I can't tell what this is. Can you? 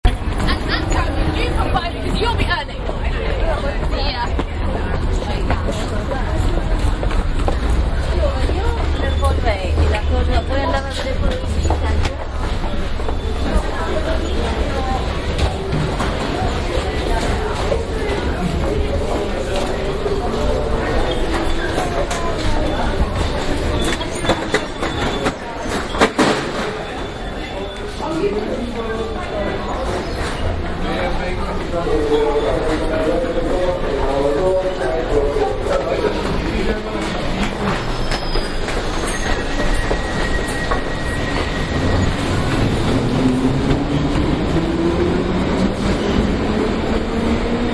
Tower Hill - Station
ambiance, city, field-recording, london, general-noise